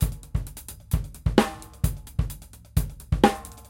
Hihat16th 2m
Acoustic drumloop recorded at 130bpm with the h4n handy recorder as overhead and a homemade kick mic.
drumloop, drums, acoustic, h4n, loop